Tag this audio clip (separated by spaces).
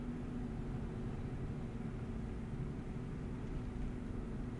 engine drive automobile motor driving